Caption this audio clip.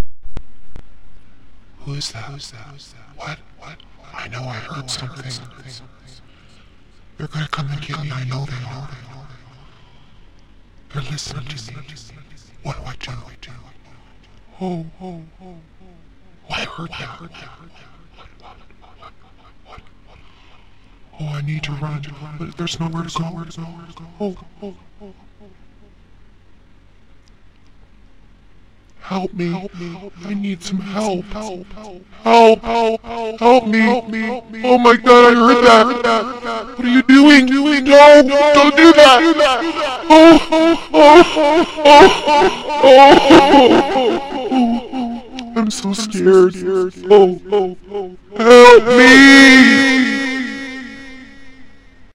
low pitch version of: what will I do, being tormented by a demon. Done with audiocity with echo by Rose queen of scream